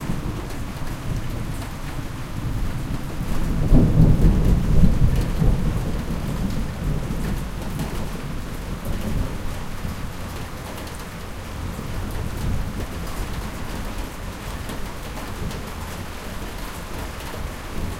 It's raining.There is still thunder.
rain, raindrop, thunder